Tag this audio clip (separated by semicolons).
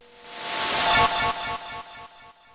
reversed; weird; echo; synth; short; noise; sound-effect